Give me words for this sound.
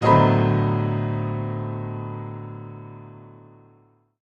I made this because I am a huge fan of horror in general. It's free for everyone even if you are a billion dollar company. I only ask for some credit for my work but then again I can't stop you from not doing so :-)
Thank you and have fun!